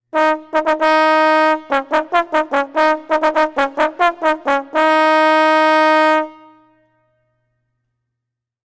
This is just a short fanfare used to announce a king in a short play.
The is a recording I made for a fund raiser i am taking part in.
horn, environmental-sounds-research, king, fan, fare, fanfare, fanfair, trom, royal, trombone, announcement, trumpet